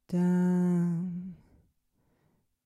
Female Vocal SIngle Note F
Single notes sung and recorded by me. Tried to name the pitch so you could organize it better
female, sing